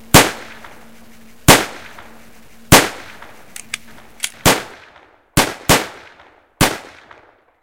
three shots and reload
Three gunshots, then reload and another four shots.
shot, boom, bangs, series, reload